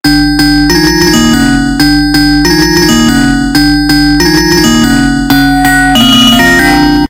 Unknown Angel
pad, beyond